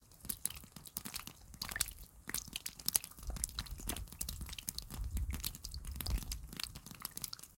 Weak Water Dripping
dripping, drops, nature, water